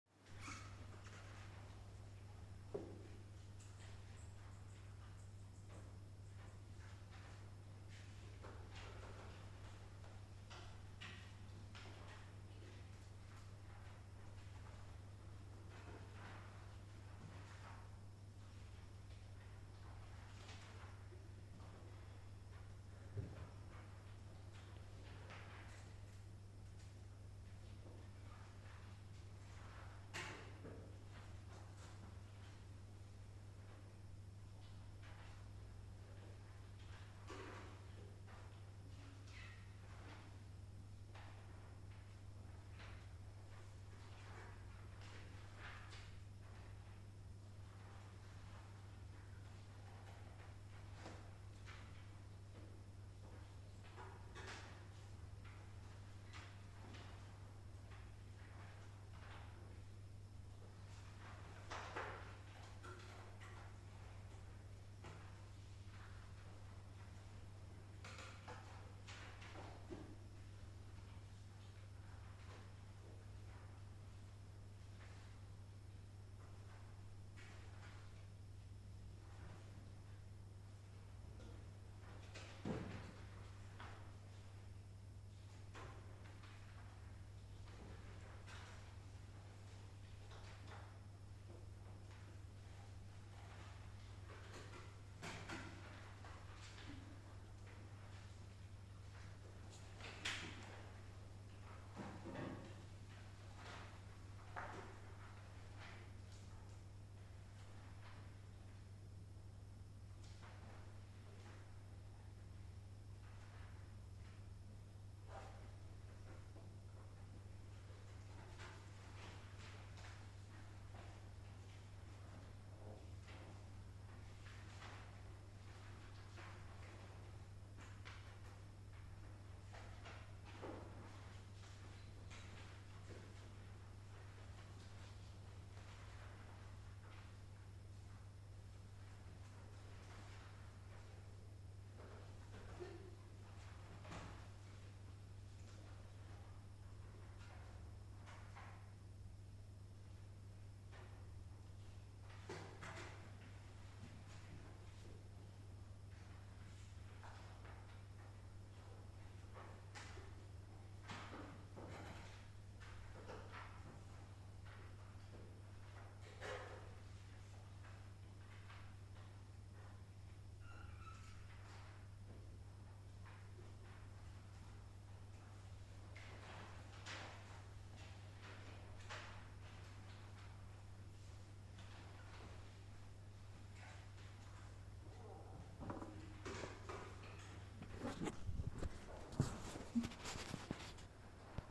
students writing an exam. you can hear them thinking
ambience, atmosphere, clasroom, exam, students, university, writing